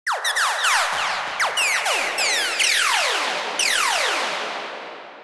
betaball lasers
volley of short laser bursts. Made for the sci-fi audio cartoon Switchboard Infinity
electronic, zaps